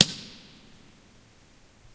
Closing book in church
The sound of a book being closed in church.
From old recordings I made for a project, atleast ten years old. Can't remember the microphone used but I think it was some stereo model by Audio Technica, recorded onto DAT-tape.
close, church, book, reverb